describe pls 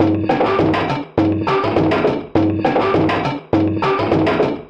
h8 lo-fi
tascam dr05 + softwares 102 bpm
percussion, field-recording, rhythm, improvised, drums, drum, vintage, breakbeat, beat, electronica, idm, percs, experimental, drum-loop, groovy, quantized, lo-fi, loop